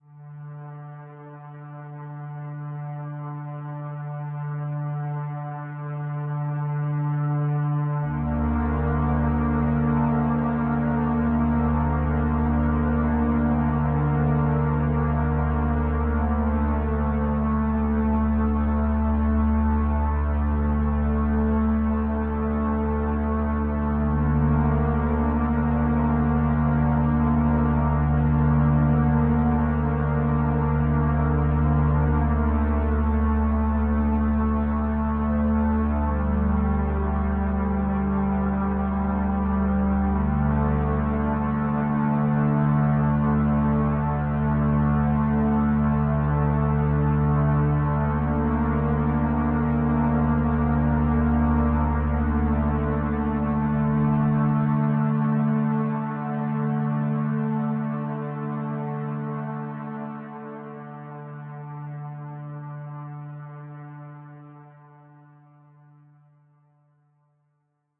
hiss and boo
Ambient pad for a musical soundscape for a production of Antigone
ambient, dark, deep, drone, musical, pad, soundscape